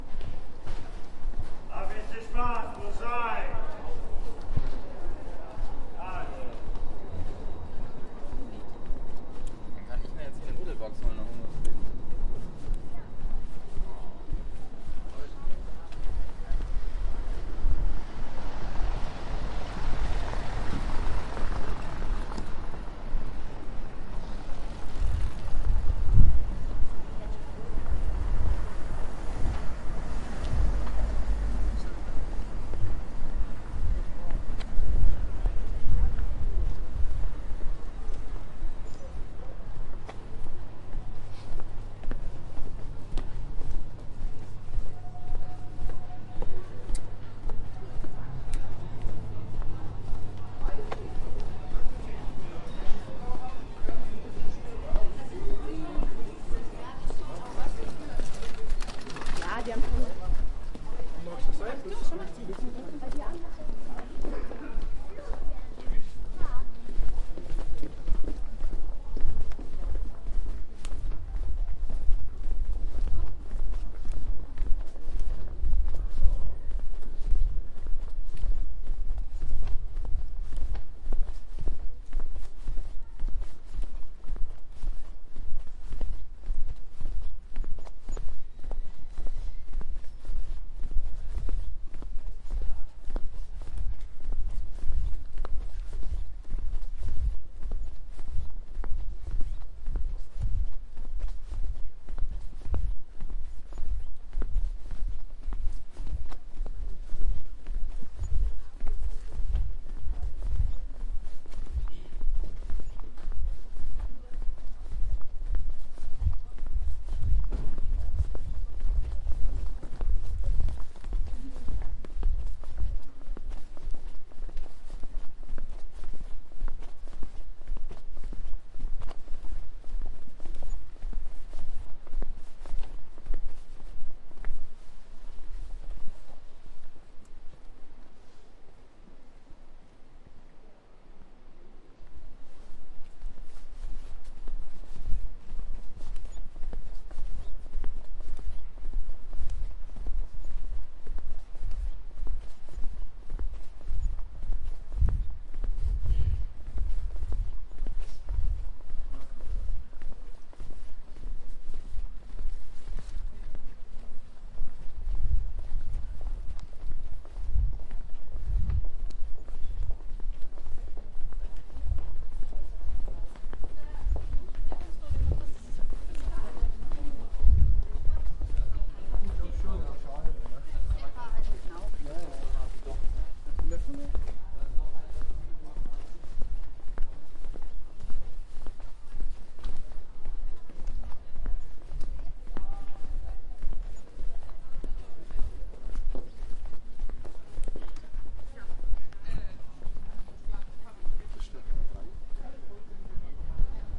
Ambient city sounds walking people
I was walking through the city center of Augsburg, Germany, there's a car passing by, some people waking and chatting.
soundscape, atmosphere, ambience, cars, recording, general-noise, ambient, traffic, noise, people, walking, tram, car, town, field-recording, cobblestone, street, pavement, field, city